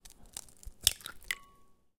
Cracking an egg into a glass bowl.